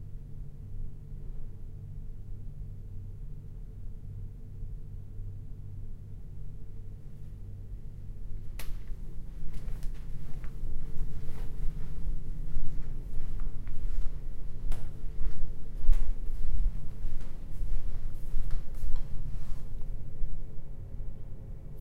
Hotel do Mar,Sesimbra, Portugal 23-Aug-2012 06:50, recorded with a Zoom H1, internal mic with standard windscreen.
Indoors ambiance recording.
I woke up very early to go outside and make some recordings.
This is the sound of me walking in flip-flops on the corridor outside my hotel room.